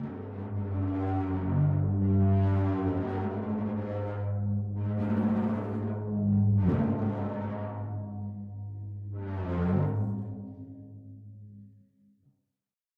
timp superball mallet 4
timpano (kettle drum) played with a superball mallet. similar to #3 but a bit longer and the pitch changes more. (this is an acoustic recording, no effects have been added! the apparent reverb is from the drum itself, not the room)